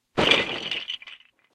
Lego fall apart1
One of several classic Lego star wars sounds that i recreated based on the originals. It was interesting...legos didn't really make the right sounds so I used mega blocks.